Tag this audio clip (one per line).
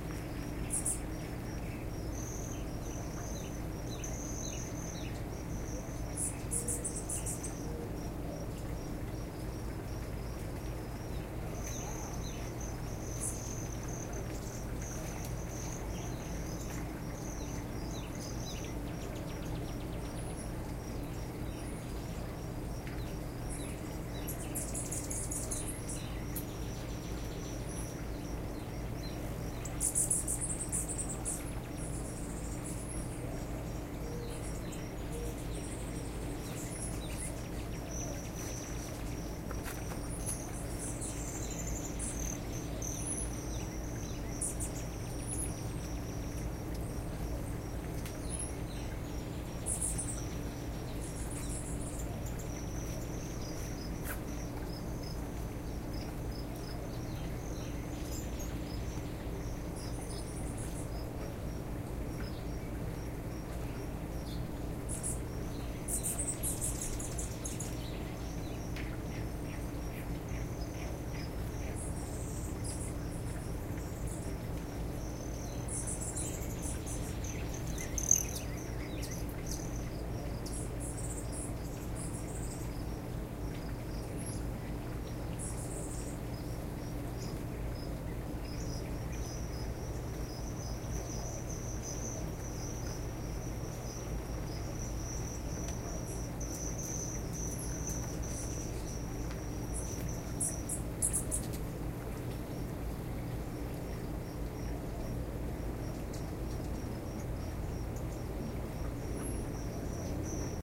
aviary
crickets
zoo
field-recording
desert
hummingbird
birds
arizona